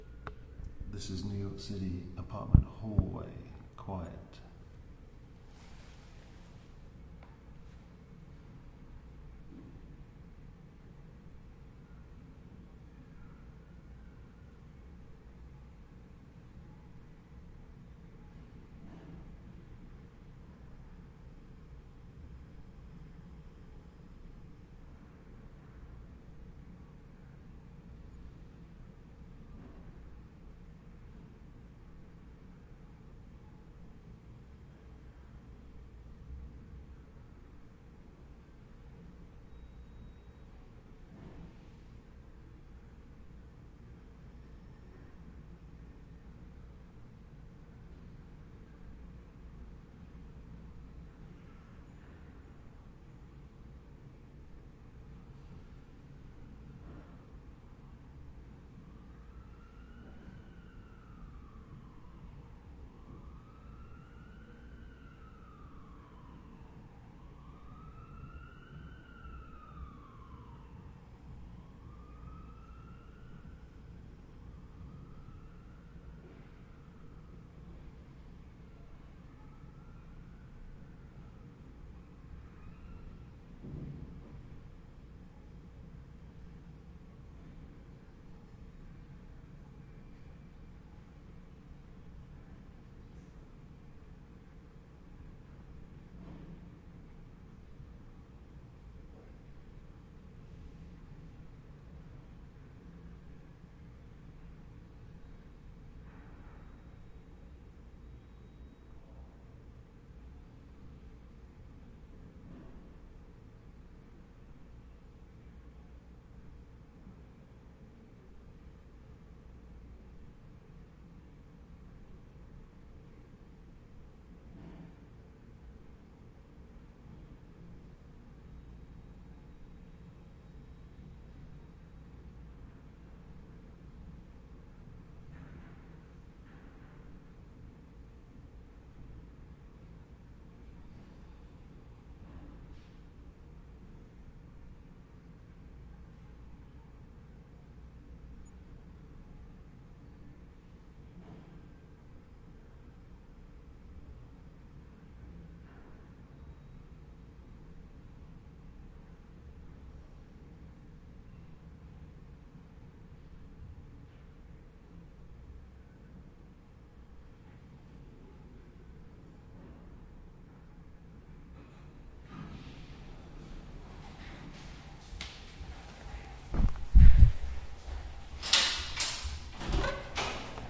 A recording of a quiet hallway in a New York apartment building
atmos, hallway, nyc, quiet